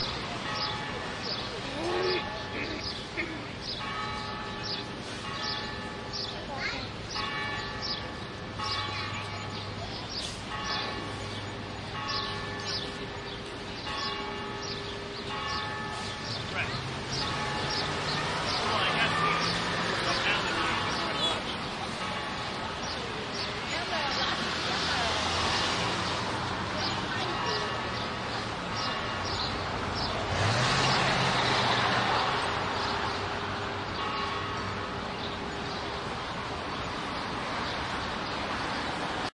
A church bell ringing at Houston and Laguardia in New York City recorded with DS-40 and edited in Wavosaur.